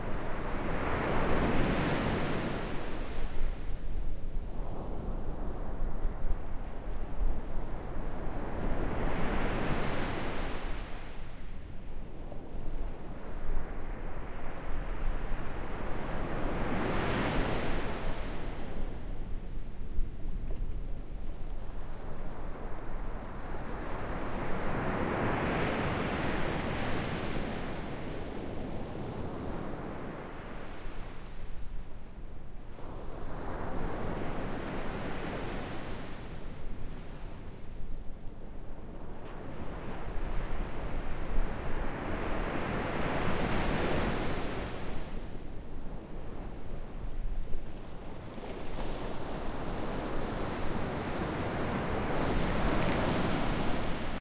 ocean waves 2
ocean waves recorded in a windy day on the northern portuguese atlantic shore
field-recording, ocean, sea, soundscape, waves